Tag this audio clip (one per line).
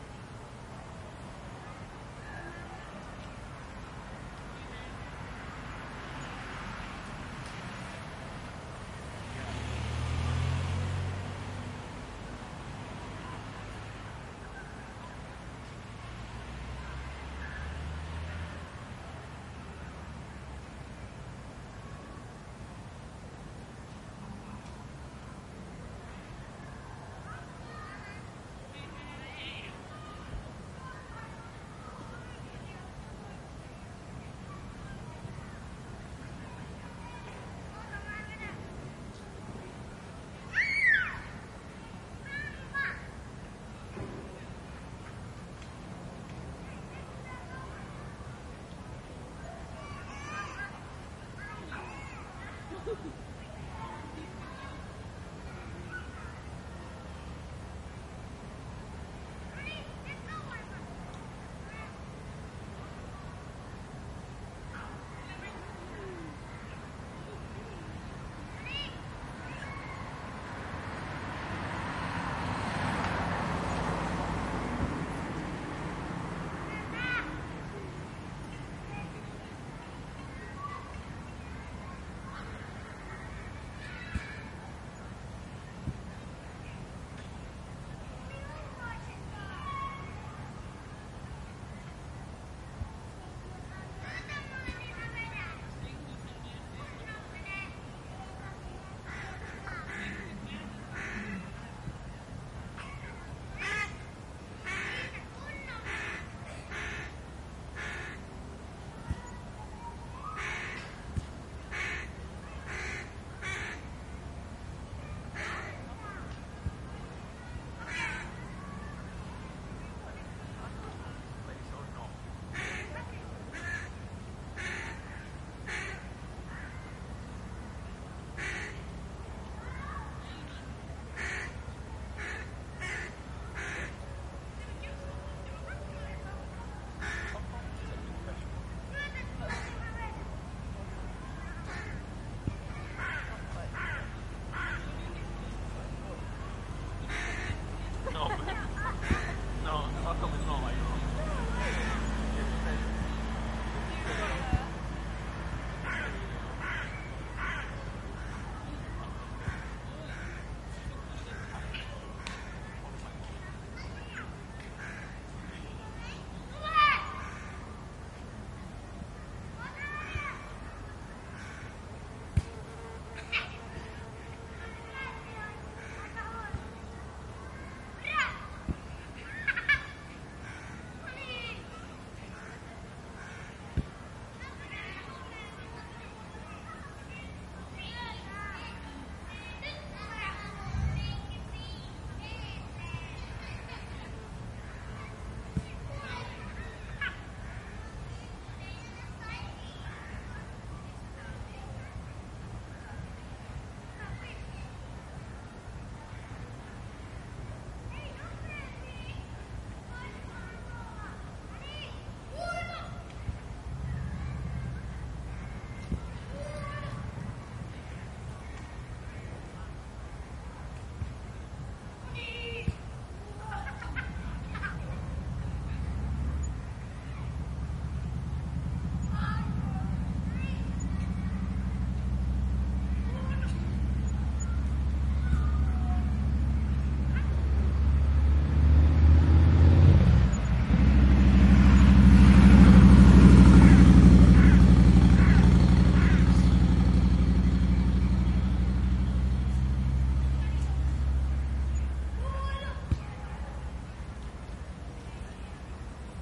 ambience
birds
children
city
Europe
field-recording
motorcycle
nature
park
peaceful
people
quiet
Stockholm
surround
Sweden
urban